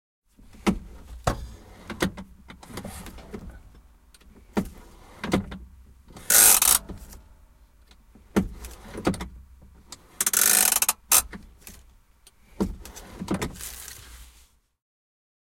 Käsijarru, pakettiauto / Handbrake, van, car, clicks, crackles and creaks, interior, close sound, Toyota Hiace, a 1990 model
Käsijarru päälle ja pois muutaman kerran, narahduksia, raksahduksia ja naksahduksia, lähiääni, sisä. Toyota Hiace, vm 1990.
Paikka/Place: Suomi / Finland / Lohja, Koisjärvi
Aika/Date: 15.09.1991
Narahdus, Parking-brake, Auto, Creak, Cars, Suomi, Tehosteet, Motoring, Field-Recording, Autoilu, Autot, Finnish-Broadcasting-Company, Click, Car, Yle, Soundfx, Raksahdus, Handbrake, Finland